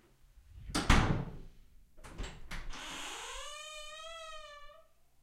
Door Open and Close 2
Door opening and closing, 3 mics: 3000B, SM57, SM58
door, slam